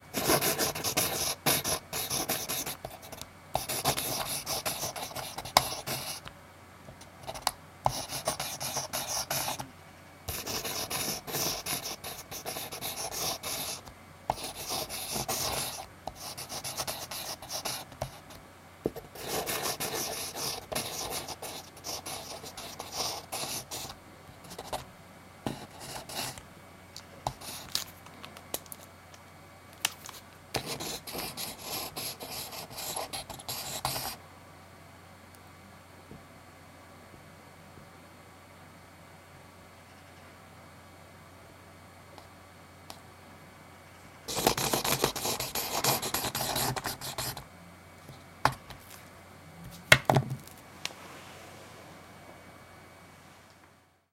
Mono recording of a person writing on paper in a room. Recorded with a Rode NT1 condenser large diaphragm microphone from a 5cm distance. University West 2009 in Sweden.
classroom, students, university, west, writing